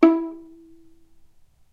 violin pizz vib E3
violin pizzicato vibrato
violin, vibrato, pizzicato